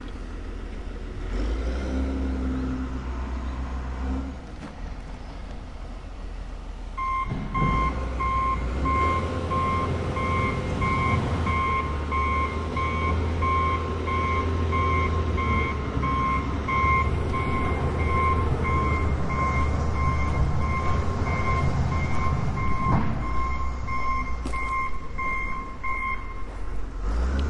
tractor back up beep
back-up-beep, field-recording, tractor